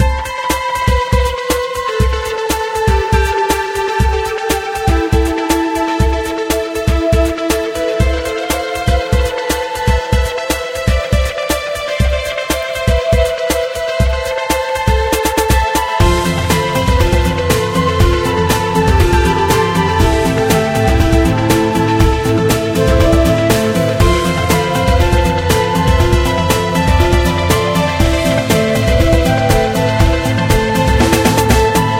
Loop StrongerAlone 05
A music loop to be used in storydriven and reflective games with puzzle and philosophical elements.